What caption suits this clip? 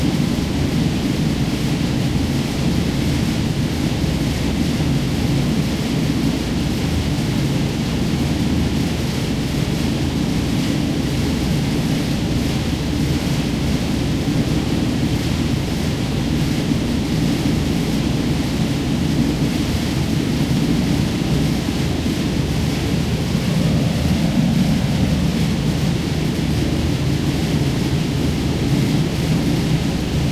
charles shurz park
Some kind of air circulation vent in the middle of the park along the East River
air, duct, fan, field-recording, motor, vent, wind